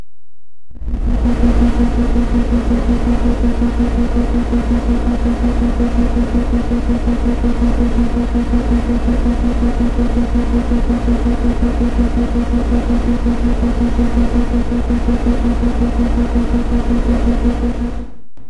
Even MORE SYnthetic sounds! Totally FREE!
amSynth, Sine generator and several Ladspa, LV2 filters used.
Hope you enjoy the audio clips.
Thanks